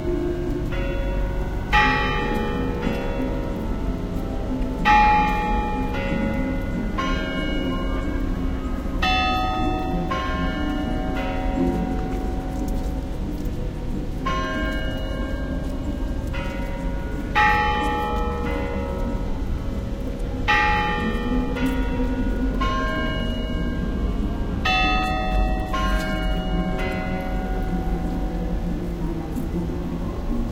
A short recording (caught it in the middle) of Moscow Kremlin clock tower bells.
Winter fair was on the right side of the Red Square.
made with Roland R-26's OMNI mics.